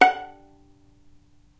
violin pizz non vib F#4
violin pizzicato "non vibrato"